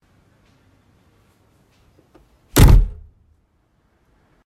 Closed the door at my home